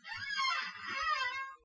distant creak
leaning on my computer chair that desperately needs some WD-40. this time, my mic is a bit further away. for reasons I cannot control, there may be noise.
chair, squeak, creak